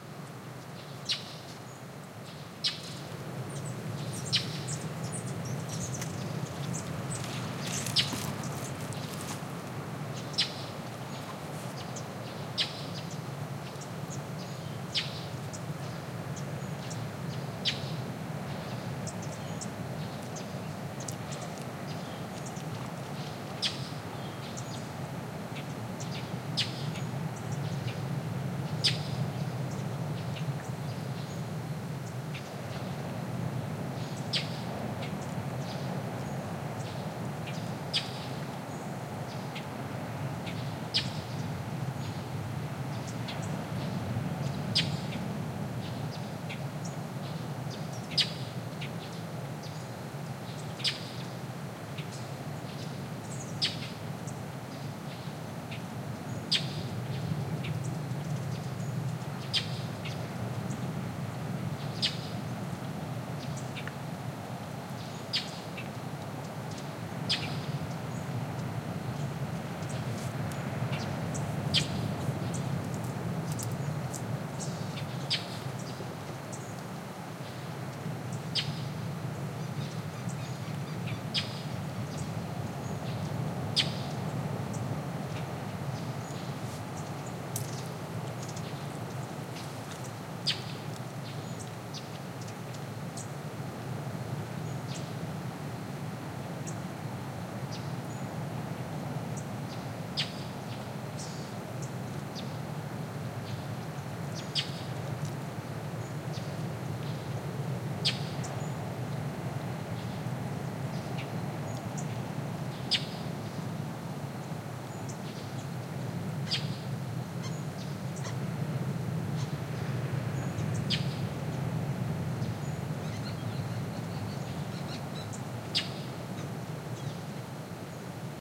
20101209.04.palm.orchard
Quiet ambiance with birds calling and ocean noise in far background. Recorded inside the palm orchard at San Pedro (Todos Santos, Baja California S, Mexico). Soundman OKM mics, Olympus Ls10 recorder
beach, nature, field-recording